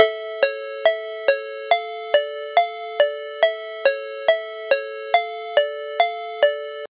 14 SUSPENSE 8VA
mojo-mills, alert, 14, ring, phone, ring-alert, mills, cell, tone, jordan, mojomills, ring-tone, mono, cell-phone, suspense, 3, free, 8va